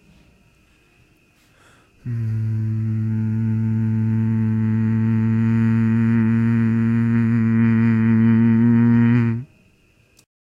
Deep Humming Noise
Me humming in a deep tone.